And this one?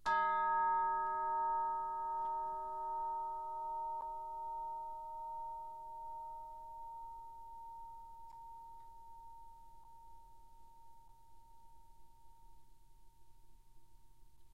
chimes d#3 pp 1
Instrument: Orchestral Chimes/Tubular Bells, Chromatic- C3-F4
Note: D#, Octave 1
Volume: Pianissimo (pp)
RR Var: 1
Mic Setup: 6 SM-57's: 4 in Decca Tree (side-stereo pair-side), 2 close